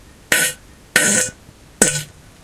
fart poot gas flatulence
fart, flatulence, gas, poot